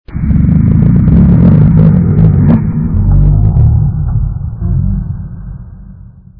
My Stomach's Angriest Message of Hunger
Now my belly is trying to angrily tell me that we have been waiting for hours for food, this means about 212 hours without any food. Oh, dear! What do I do now?